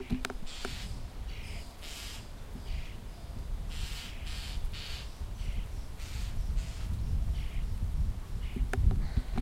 Szpacza matka przegania intruza
This is angry starling.